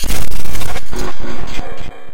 glitchdrums count03
A very odd glitched drum sample.
glitch, noise